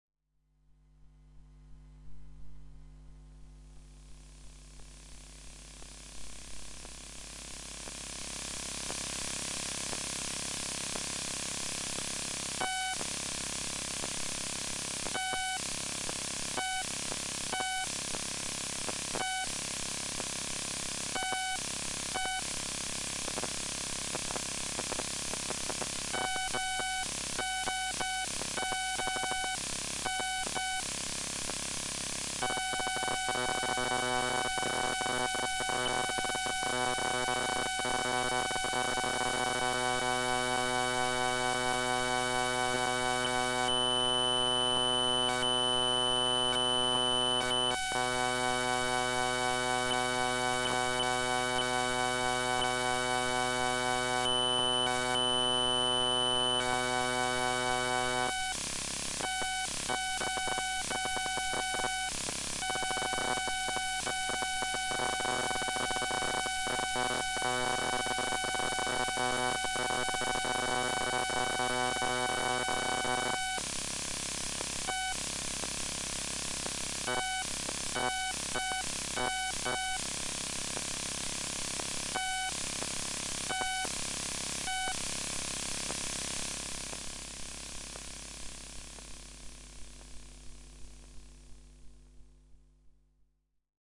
Bluetooth Mouse
experimental, sound-enigma, electronic, sound-trip